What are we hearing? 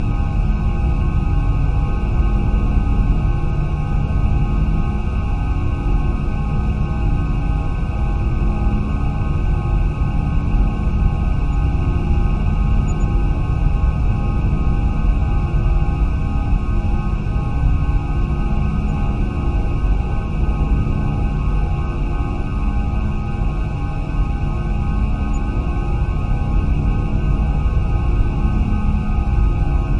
S L 2 Scifi Room Ambience 03
Ambience for a scifi area, like the interior of a space vessel.
This is a stereo seamless loop.
Room, Tone, Turbine, Scifi